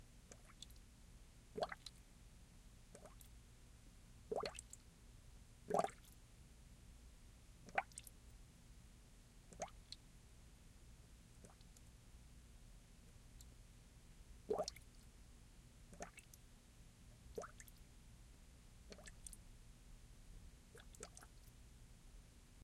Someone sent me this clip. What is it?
These are very soft and quiet bubbles burbling up to the surface of the water. I couldn't find any sounds for soft, gentle bubbles – so I made some.
Recorded using a Blue Yeti, with a large plastic bowl in front of the microphone, and straws taped together reaching outside the booth.
Raw, unprocessed audio.